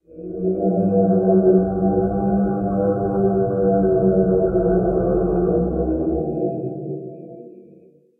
Creature in da cave v2
Another version of my Creature in da cave sound, this time with a little more 'cave' to it.
creepy, creature, roar, tense, echo, cave